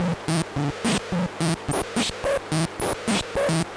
Similar to other electronic percurrion loops in the pack, but a bit more glitchy.
Made with a Mute Synth 2.